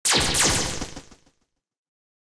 Retro Game Sounds SFX 66
effect, fx, gameaudio, gamesound, pickup, sfx, Shoot, shooting, sound, sounddesign, soundeffect, Sounds